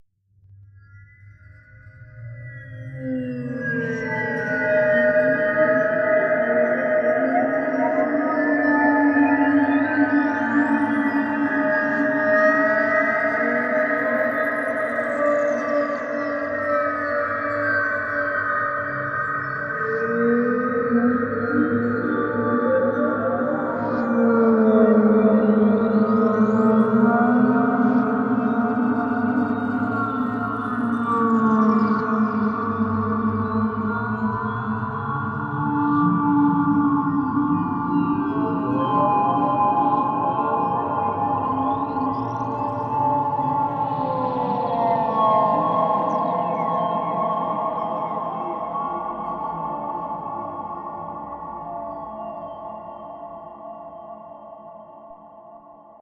howling animal 0U16apt2
An accidental product of playing with a synthesizer.
accidental, animal, artifical, bleep, howl, random, sing, slow, spatial, synth, synthetic, tweet, twitter, whale, yelp